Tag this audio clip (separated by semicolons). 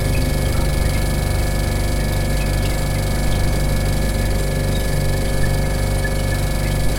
fridge; cold; refrigerator; old